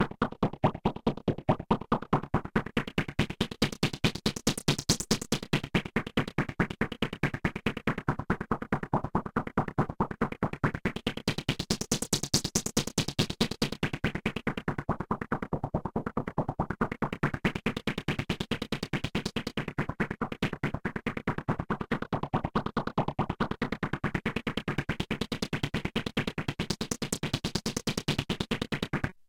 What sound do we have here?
wet slaps
Funny loop made with a korg triton.
squishy, synth